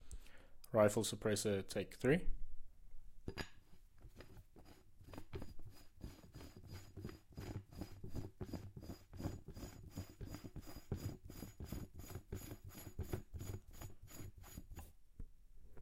Screwing on a suppressor of a .308 rifle